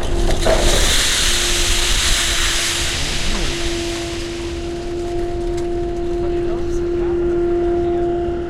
noise of metal scraps being piled on the docks of the Seville harbor. Shure WL183 capsules, Fel preamp, Olympus LS10 recorder. Recorded in the port of Seville during the filming of the documentary 'El caracol y el laberinto' (The Snail and the labyrinth), directed by Wilson Osorio for Minimal Films. Thanks are due to the port authority for permission to access the site to record, and in particular to the friendly crane operator